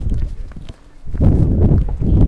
Ambient sounds of sea and people and amusements at Herne Bay, Kent, UK in the last week of July 2021. Things were probably a little quieter than usual because of coronavirus even if the official lockdown ended a week or so earlier.
ambient, field-recording, Herne-Bay, Kent, seaside